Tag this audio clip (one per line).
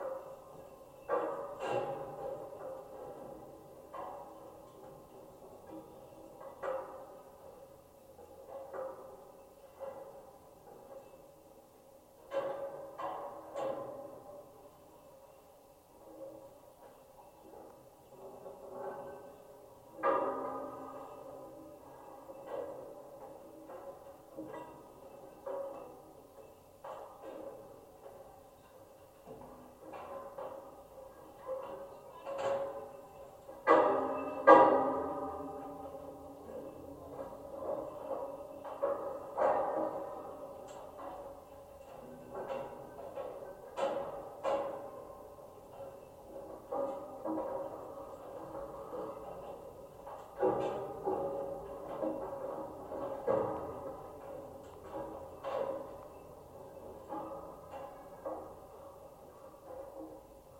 bridge
cable
Calatrava
contact-mic
field-recording
metal
mic
PCM-D50
Schertler
steel